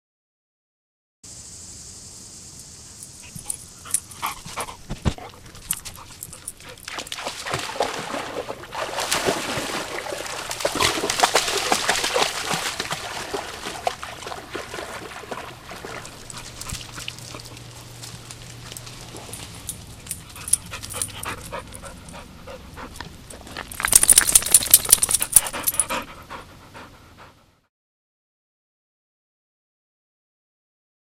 Dog splashing in a lake
My dog Chloe splashing around in the lake at Moraine Hills State Park in Illinois. She sniffs at the mic and shakes water off of herself. Some ambient nature sounds as well.